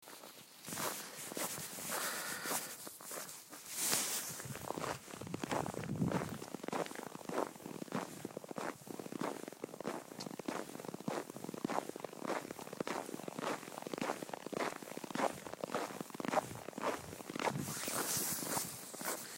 Walking in snow
Fast footsteps in snow, cold weather
winter, cold, snow, Footsteps